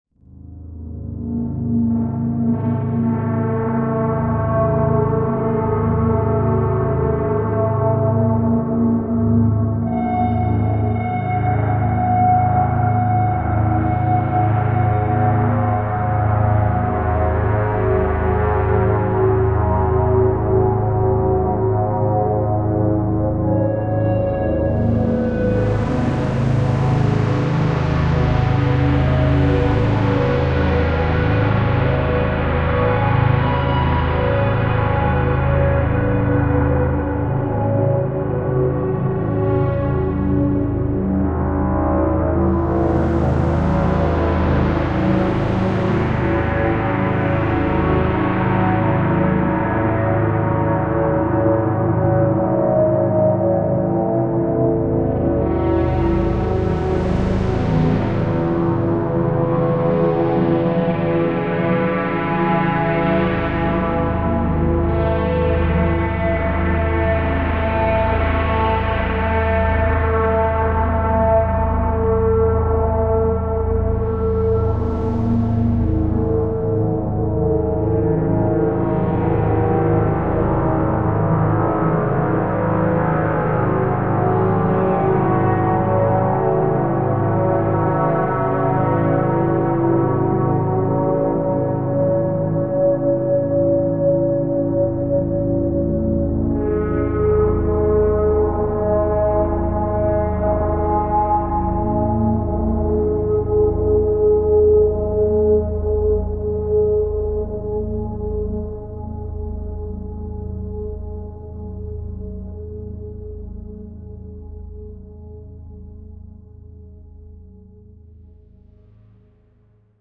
A sound generated in the software synthesizer Aalto, recorded live to disk in Logic and edited in BIAS Peak.
synthesized, abstract, ominous, metallic, resonant, soundscape